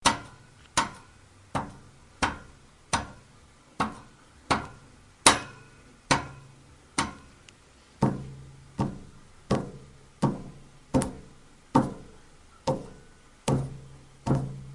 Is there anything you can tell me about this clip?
Several hits and taps of a finger on a metal radiator.
An example of how you might credit is by putting this in the description/credits: